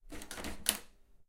Door Handle 12
Office door. Recorded with Zoom H4n
open, field-recording, door, handle, close